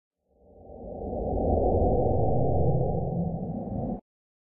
White noise processed with TL Space.
Potential spooky sound with cut off ending.